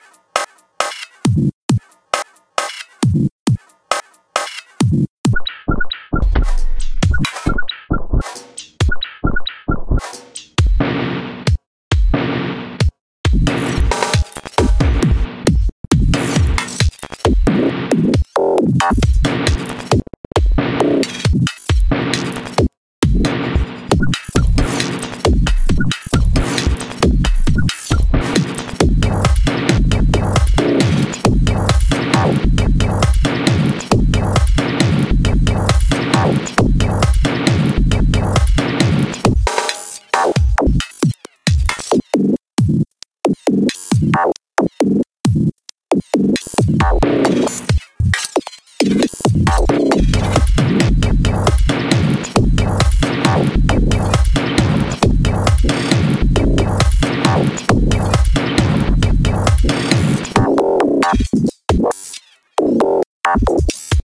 Glitch Drums Experimental
Experimental, Glitch, Drums